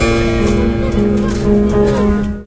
One key of an out of tune harpsichord on a flea market. Recorded on an Edirol R-09 with built-in mics.